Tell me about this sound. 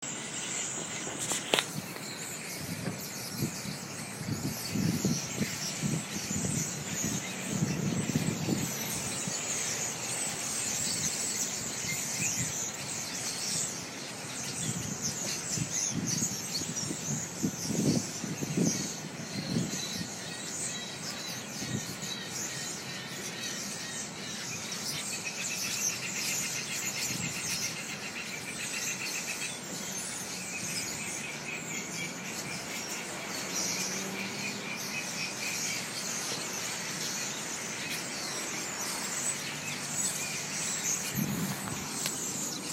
Birds at the ferry terminalsaqmukjku 2
Recorded at ferry terminal in Tsawwassen, BC, Canada. It's like Alfred Hitchcock's The Birds in there! They have a pesky starling issue. Thousands of noisy birds hanging out under the shelter of a tall metal tower -- kind of echoey sound, some wind and mic noise. Recorded with iphone on the spur of the moment cause it was so impressively noisy!
birds, chattering, field-recording, nature, noisy, starlings, traffic, wind